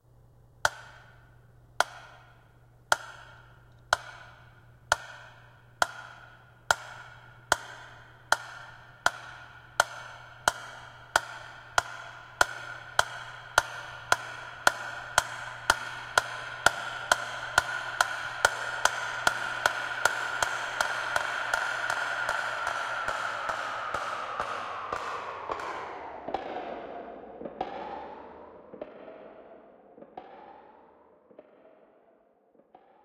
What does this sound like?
Creative Tempo Clock
clock, clockwork, creative, experimental, metronome, tempo, tick, tock